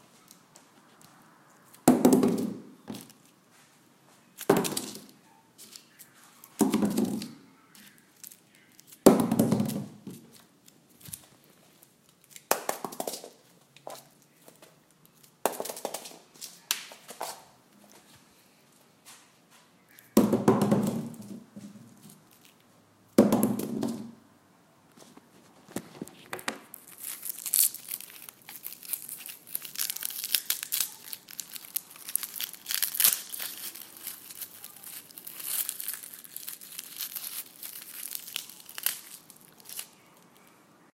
Garlic roll and peel
garlic rolling on wood table and peeling
garlic, peel, roll